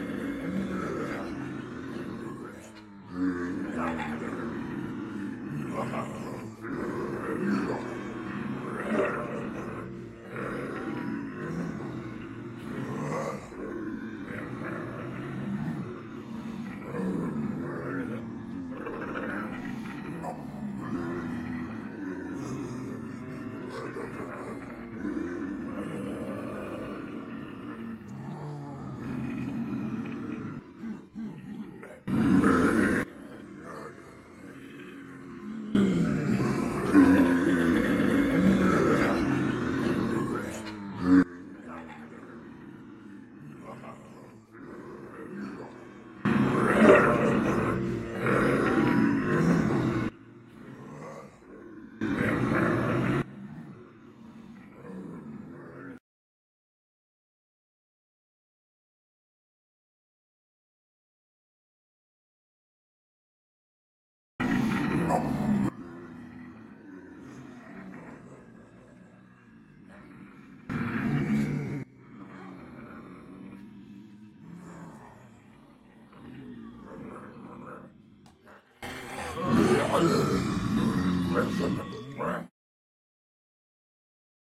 Zombie Group 8D
Multiple people pretending to be zombies, uneffected.
horror, solo